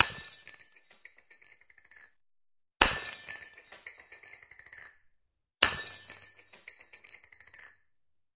Breaking glass 4
A glass being dropped, breaking on impact. Glass rolling afterwards.
Recorded with:
Zoom H4n on 90° XY Stereo setup
Zoom H4n op 120° XY Stereo setup
Octava MK-012 ORTF Stereo setup
The recordings are in this order.